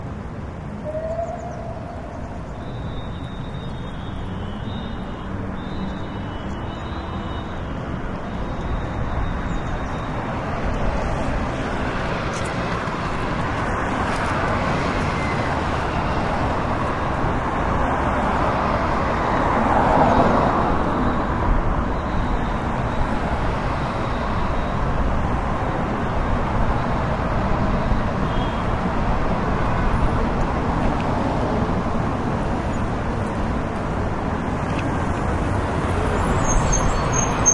20100129.paseo.del.prado
noise of traffic along Paseo del Prado, Madrid. Olympus LS10 internal mics
city-noise, field-recording, traffic